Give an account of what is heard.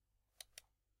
mouse right
A recording of a rightclick on a logitech cordless optical mouse.
Recorded with a superlux E523/D microphone, through a Behringer eurorack MX602A mixer, plugged in a SB live soundcard. Recorded and edited in Audacity 1.3.5-beta on ubuntu 8.04.2 linux.
pc, mouse, office, logitech, rightclick